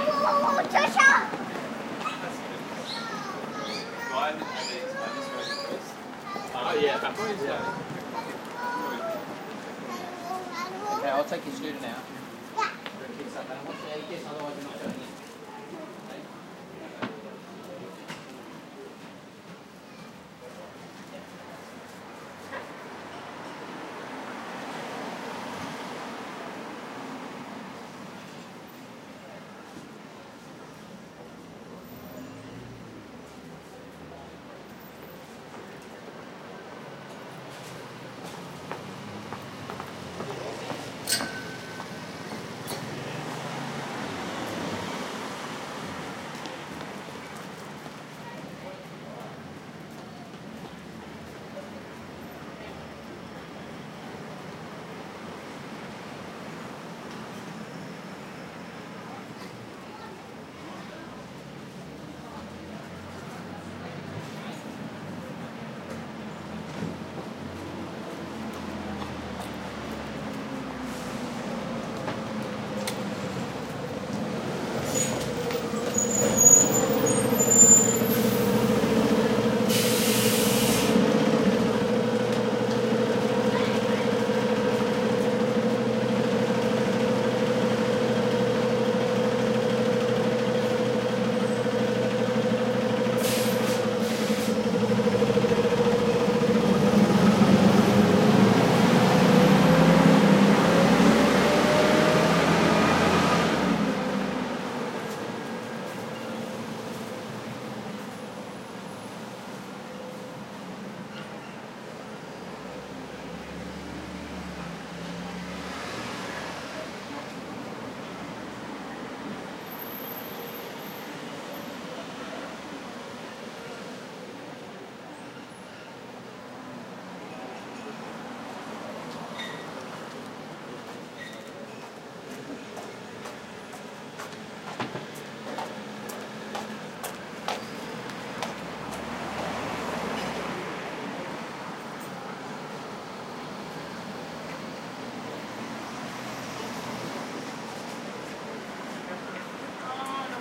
City Street Weekend
In a busy shopping & cafe street with families, a bus and other traffic passing by. Original recording with no post-processing.